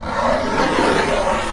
The box was about 35cm x 25cm x 7cm and made of thin corrugated cardboard.
These sounds were made by scrapping the the box with my nail.
They sound to me like a roar.